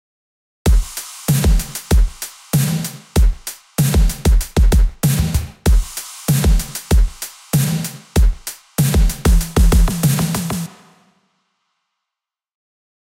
96 Bpm DIY Synthwave Drum Loop
100% synthesised '80s' drum loop that I made using mostly 'Drumaxx' plugin from FL Studio and it's native plugins (:
Kick, Retrowave, Snare